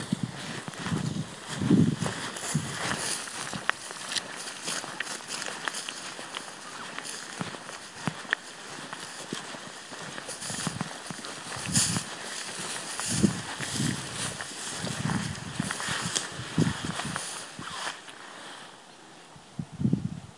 walking trough wetlands
walking trough the flood plane at Kwade Hoek (Evil Corner) on the Dutch isle of Goeree.
nature, people, walking, wetlands